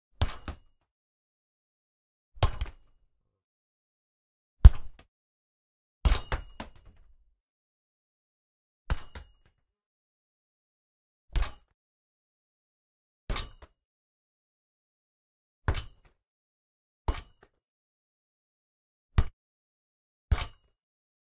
07 Football - Goalpost
Kicking the football into the goalpost.
CZ, Czech, Goalpost, Pansk, Kick, Sport, Panska, Football